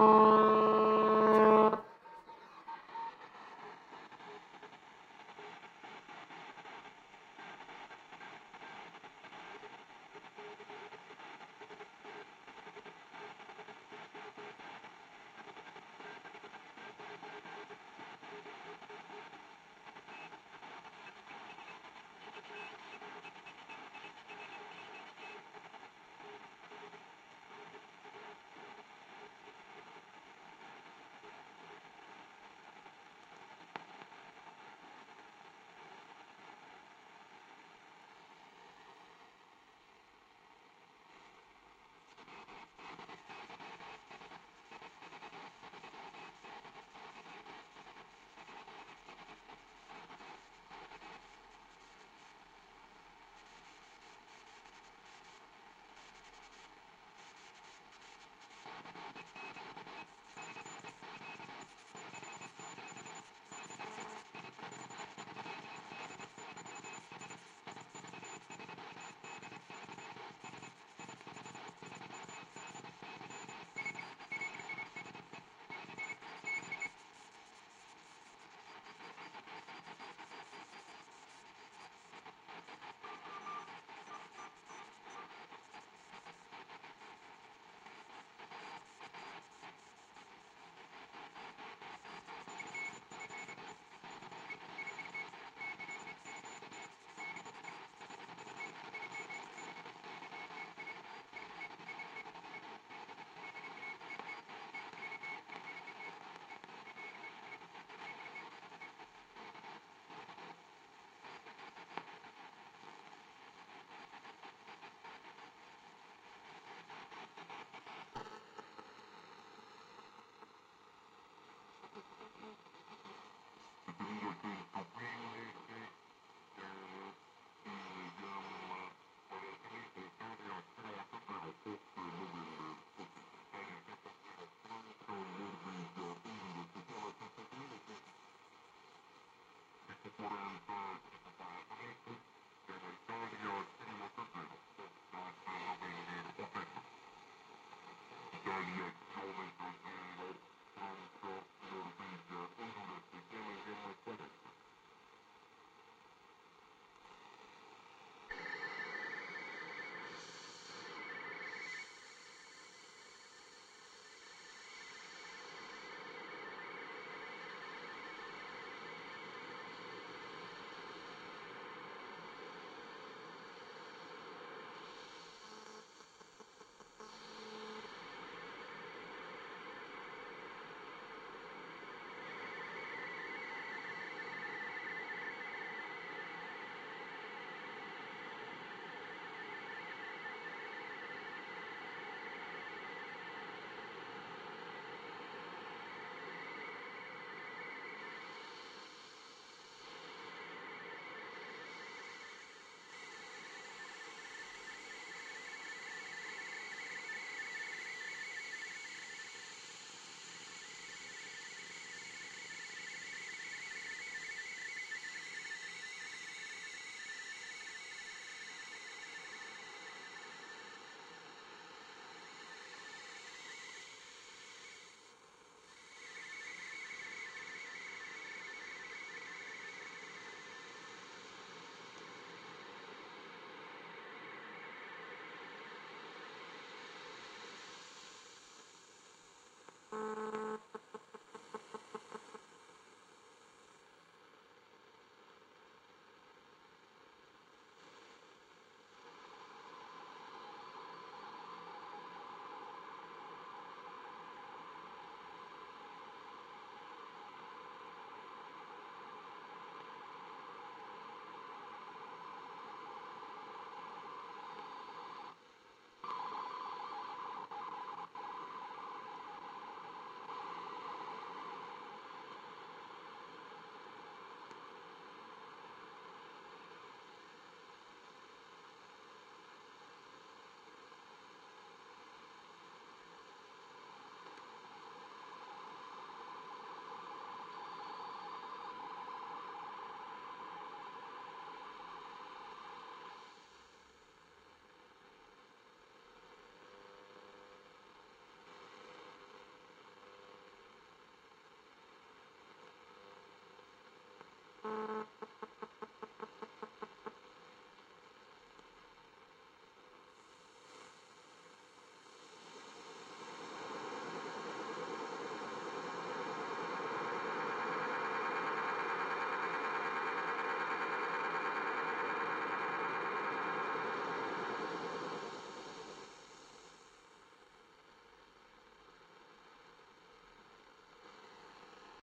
radio noise
an old radio receiver record - proxima rtf
interference, noise, radio, static, wave